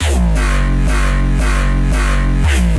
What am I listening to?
Meet the agressive and high quality REESE BASS. You can hear in top of neurofunk tunes.
Heavy and trend REESE BASS LOOP.
And this is absolutely FREE.
This one REESE BASS was created using 2 VST instruments NI Massive, standart effect plugins in DAW Ableton Live 9. Next step was REsampling and REeffecting via standart effect plugins.
All sounds was mixed in Ableton Live 9.
24.12.2014 - date of creating.
neurofunk, emperor, noisia, dnb, new, bass, reese, 2015, bassline, drum, hard, mefjus